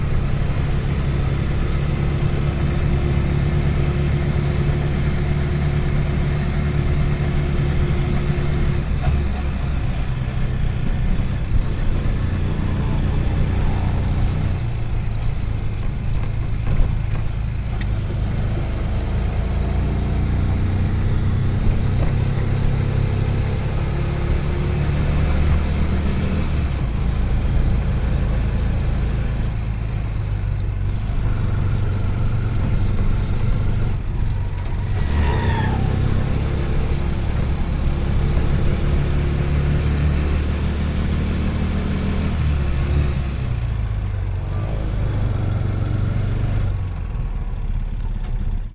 Recorded at Aarey Colony in Mumbai, India.